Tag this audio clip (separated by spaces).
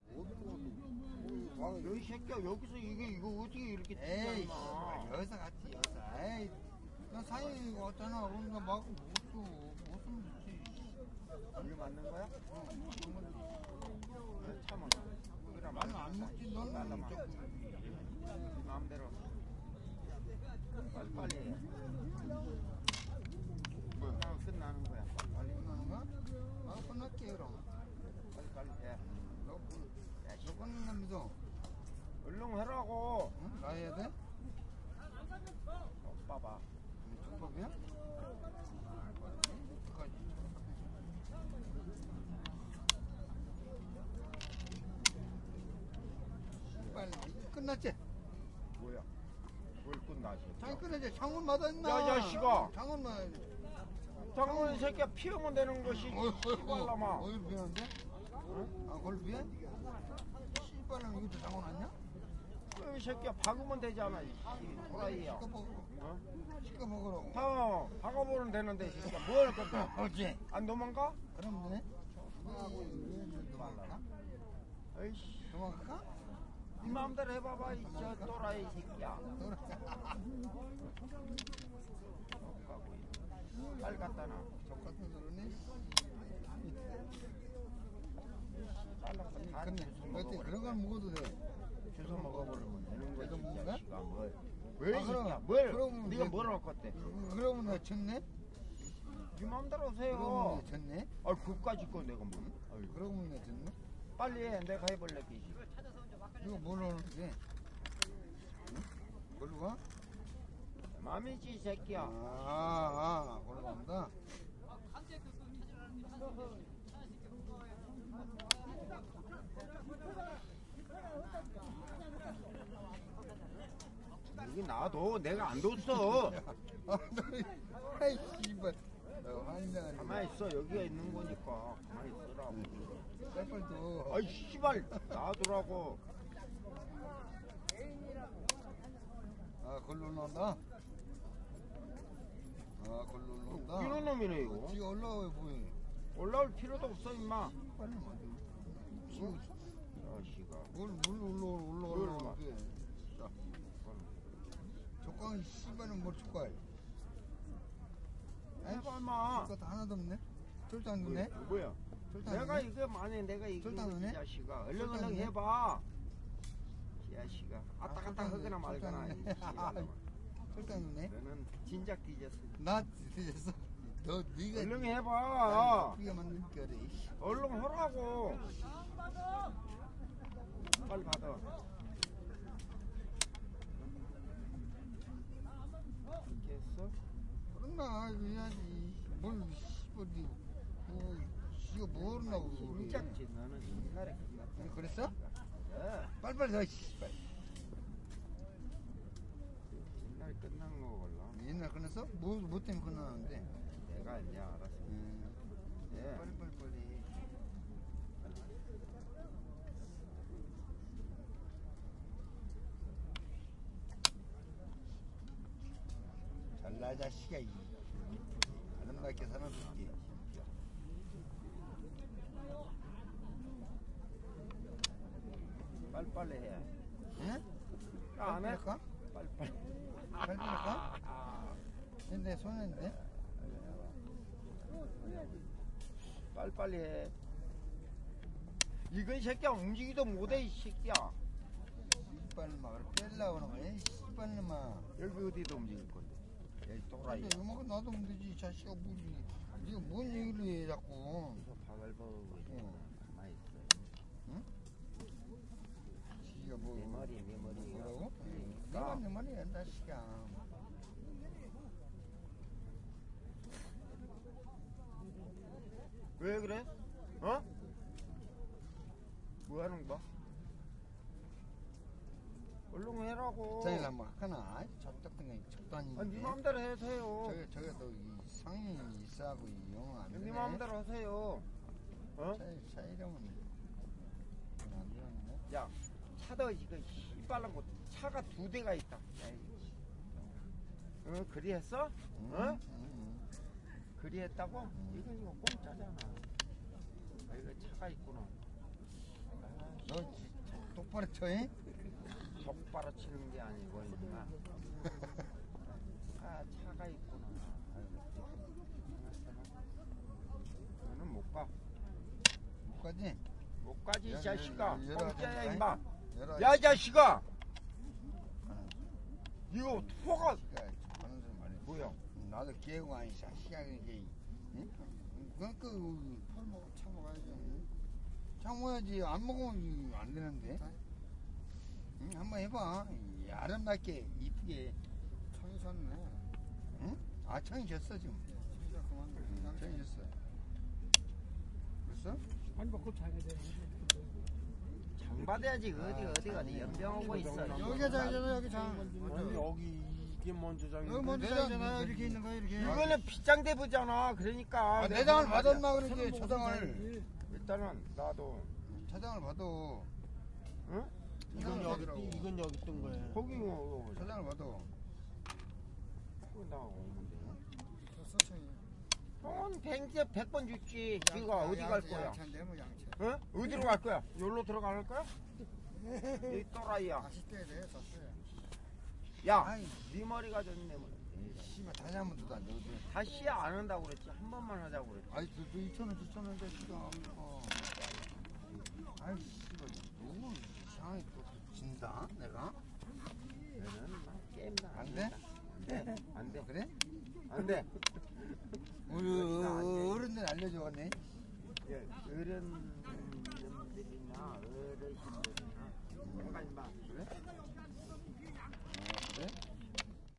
counter game seoul voice korea field-recording korean